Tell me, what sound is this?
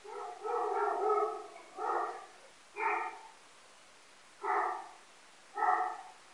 Dog barking. Visit the website and have fun.